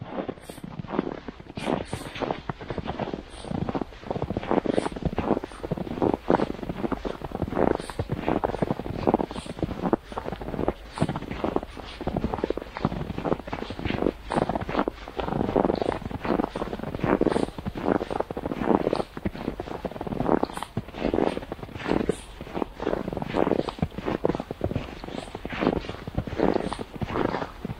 Walking in snow x
Walking in snow. Germany / Kiel / Winter 2022
field-recording, foot, footsteps, snow, walk, walking, winter